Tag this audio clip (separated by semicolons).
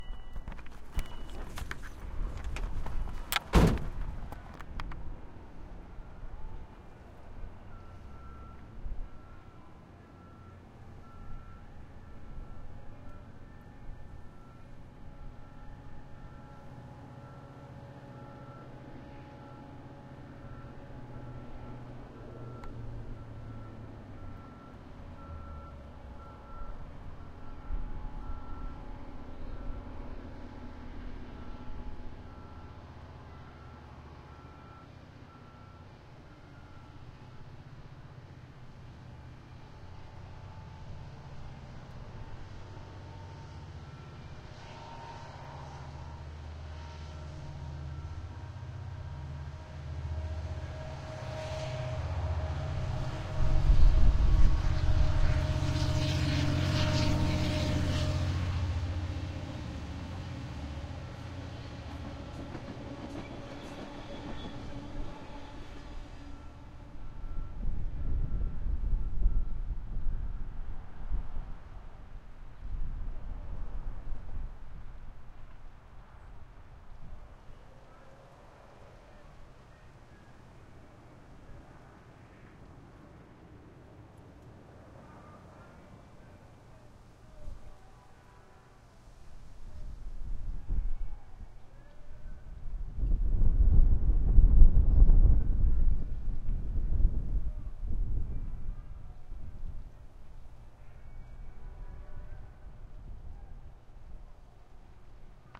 Amtrak; PCM-D50; Sony; ambient; built-in-mic; diesel; field-recording; low-frequency; microphone; train; train-horn; train-noise; urban; wikiGong; wind-noise